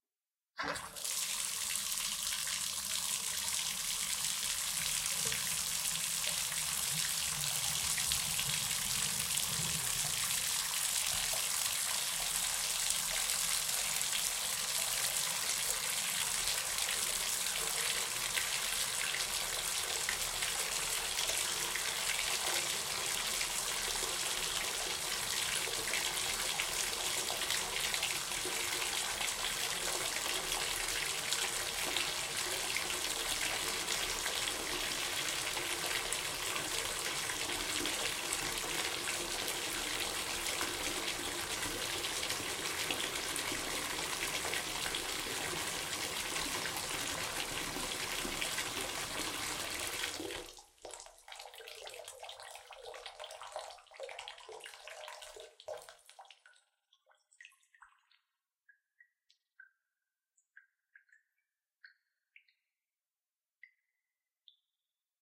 Turning on a bathtub faucet, letting it run, then turning it off.